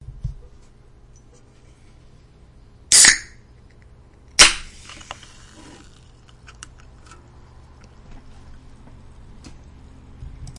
Soda Can Opening
I opened up a can of whoop ass, well actually just a can of pop! :) Emjoy
Pop, Soda, Open, Fizz, Bottle